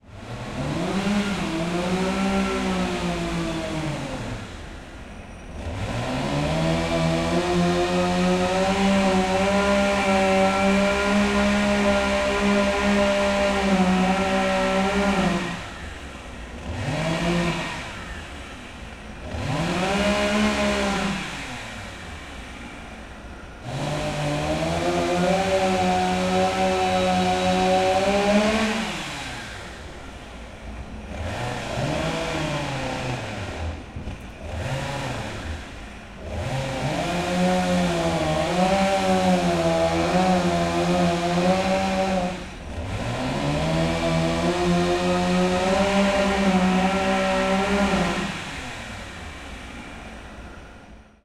Sound of a Chainsaw Running
Tree being sawed with a chainsaw near my house. The recorder was approximately 30 meters from the chainsaw. Equipment that is used: Zoom H5 recorder + Audio-Technica BP4025 Microfoon.
chainsaw, cut, machine, motor